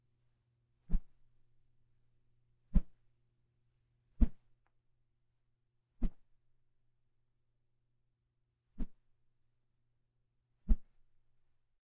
Bunch of different woosh and swoosh sounds
swoosh, woosh, swooshes, wooshes